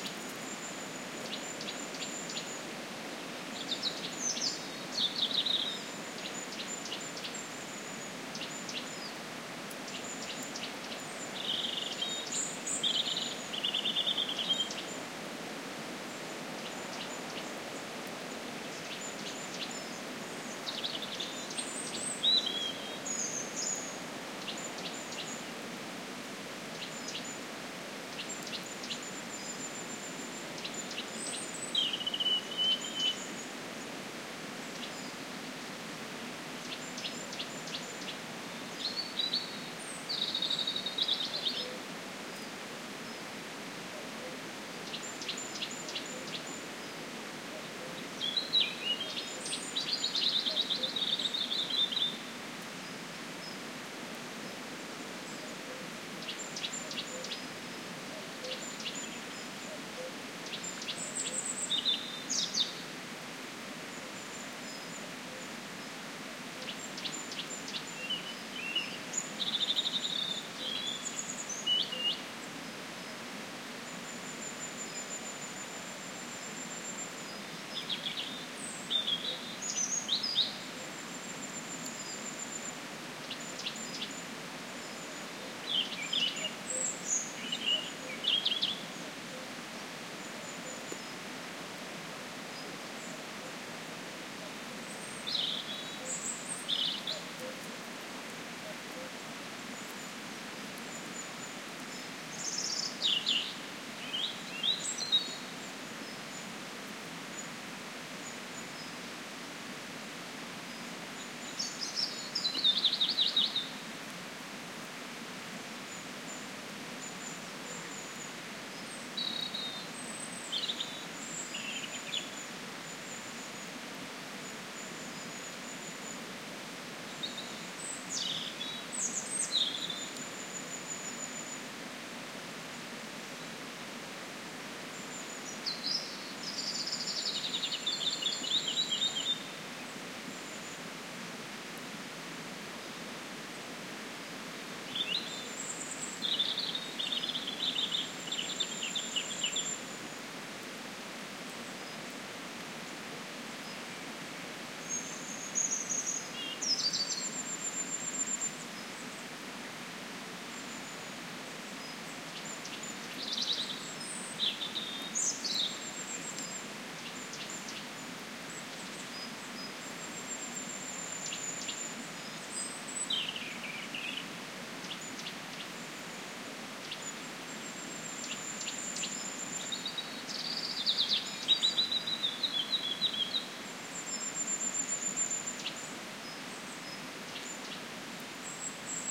Spring ambiance with birds singing, wind on trees. Primo EM172 capsules inside widscreens, FEL Microphone Amplifier BMA2, PCM-M10 recorder. Recorded at Fuente de la Pileta, near Bienservida (Albacete Province, Spain)